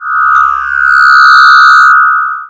an electronic sweep sound
sci-fi, scan, electronic, sweep, scifi